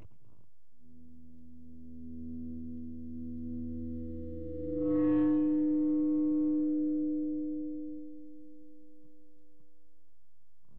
Feedback recorded from an amp with a guitar. Makes an eerie hum and can be taken strangely out of context. One of several different recordings.
amp, feedback, guitar, hum, noise, tone